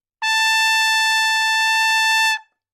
Part of the Good-sounds dataset of monophonic instrumental sounds.
instrument::trumpet
note::A
octave::5
midi note::69
tuning reference::440
good-sounds-id::1079